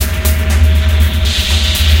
China Dream Convoloop r-03
This rhythmic drone loop is one of the " Convoloops pack 03 - China Dream dronescapes 120 bpm"
samplepack. These loops all belong together and are variations and
alterations of each other. They all are 1 bar 4/4 long and have 120 bpm
as tempo. They can be used as background loops for ambient music. Each
loop has the same name with a letter an a number in the end. I took the
This file was then imported as impulse file within the freeware SIR convolution reverb and applied it to the original loop, all wet. So I convoluted a drumloop with itself! After that, two more reverb units were applied: another SIR (this time with an impulse file from one of the fabulous Spirit Canyon Audio CD's) and the excellent Classic Reverb from my TC Powercore Firewire (preset: Deep Space). Each of these reverbs
was set all wet. When I did that, I got an 8 bar loop. This loop was
then sliced up into 8 peaces of each 1 bar. So I got 8 short one bar
loops: I numbered them with numbers 00 till 07.
120-bpm, ambient, drone, drone-loop, loop, rhythmic-drone